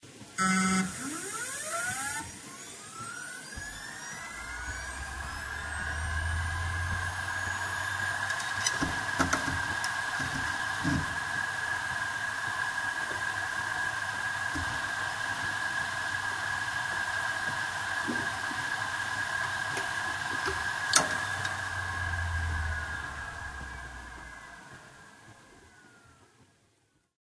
Hitachi DS 5K3000 - 5900rpm - FDB
A Hitachi hard drive manufactured in 2012 close up; spin up, and spin down.
This drive has 3 platters.(hds5c3030ble630)
disk, drive, hard, hdd, machine, motor, rattle